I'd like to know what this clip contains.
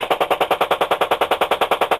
m230 chain gun burst 3
army, rifle, schuss, shot